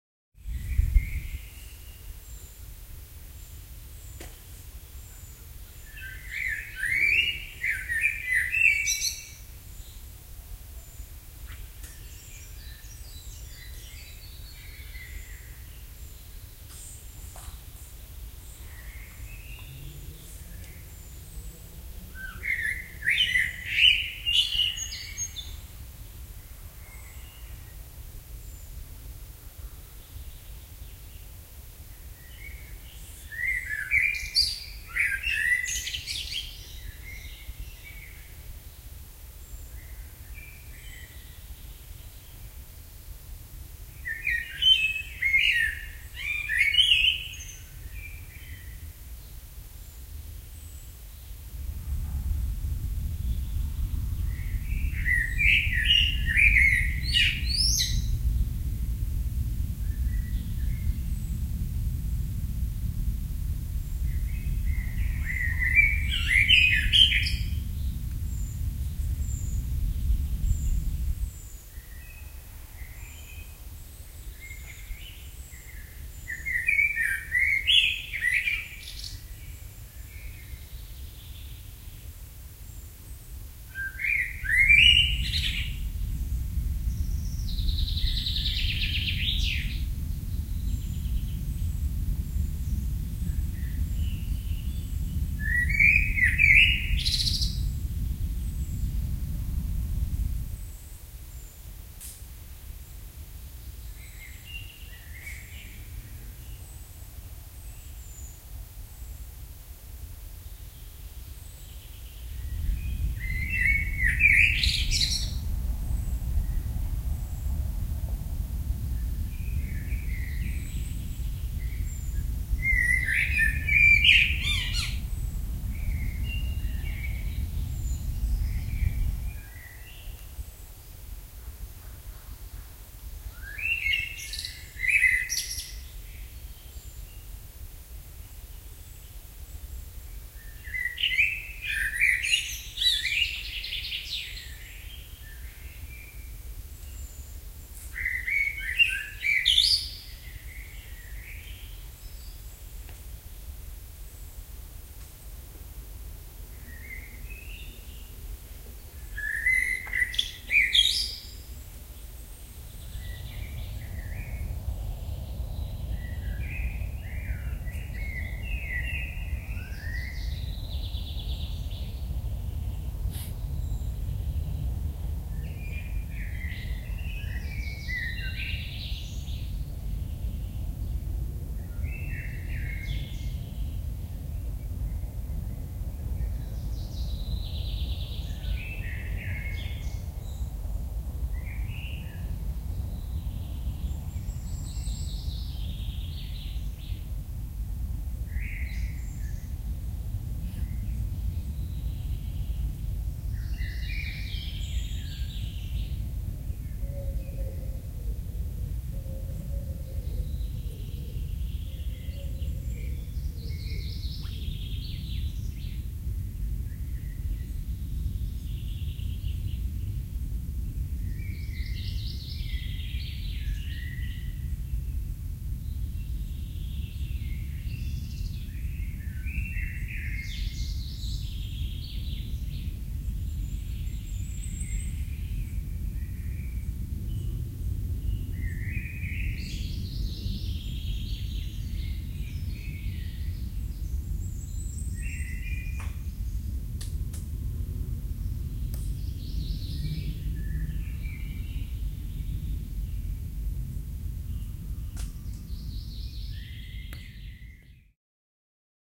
Bold Mountain in Sopot
A little gig given by birds in the forest in Sopot, Poland